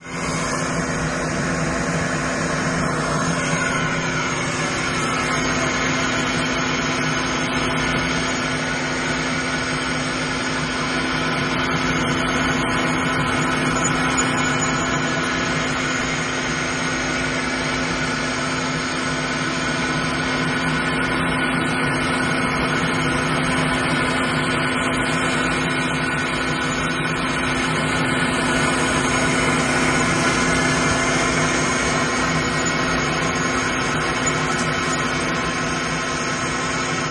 machine him
Recording outside a building of the electrical unit humming.